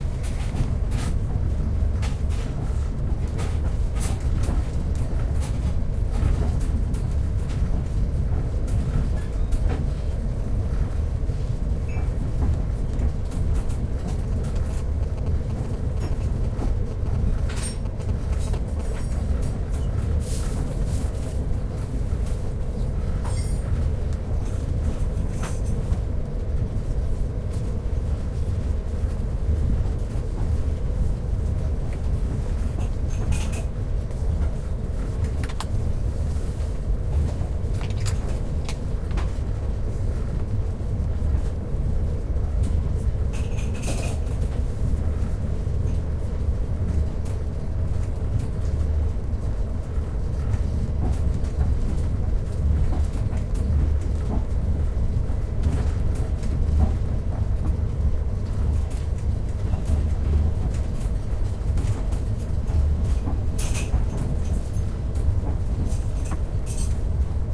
passenger wagon 20130329 1
Sound in the passenger wagon. Railway between Omsk and Novosibirsk. This is velvet track.
Recorded: 29-03-2013.
train, railway, passenger-wagon, trip, wagon, travel, noise, rumble